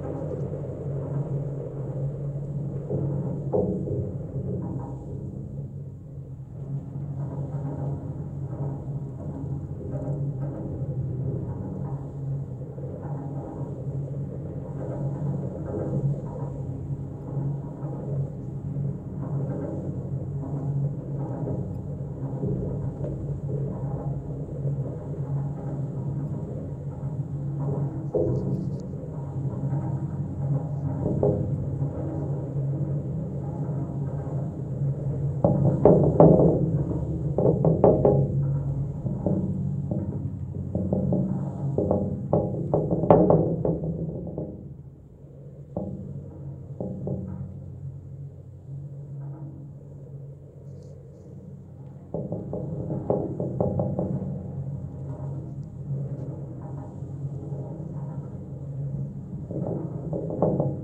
GGB A0218 suspender NE02SW
Contact mic recording of the Golden Gate Bridge in San Francisco, CA, USA at the northeast approach, suspender #2. Recorded October 18, 2009 using a Sony PCM-D50 recorder with Schertler DYN-E-SET wired mic.
Golden-Gate-Bridge, wikiGong, cable, Sony-PCM-D50, contact, field-recording, contact-microphone, Schertler, bridge, steel, contact-mic, microphone, metal, DYN-E-SET